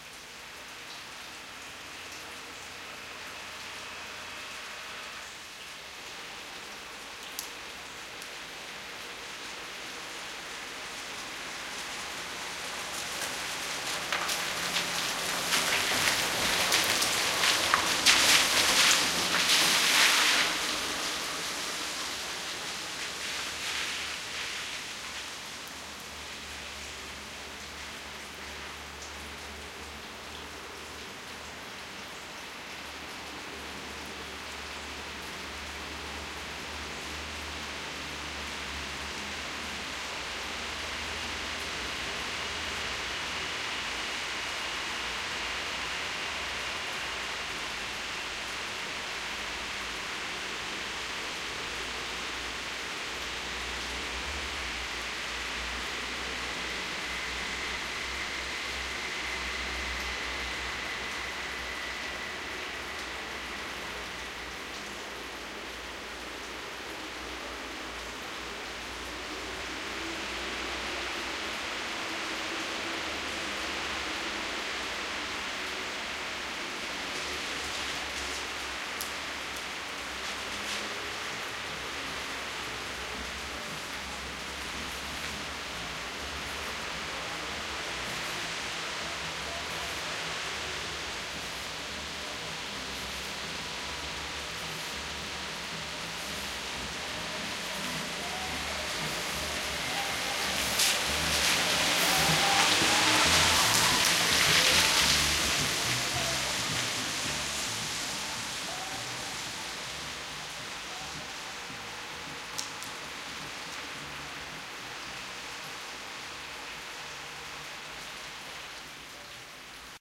Sound of a soft rain on a street and a car drive by
rain, street, car